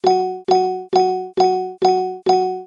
Fade out. Repeat 5 times. Reverse the sequence. Change Speed ( -47 percent) Standardize everything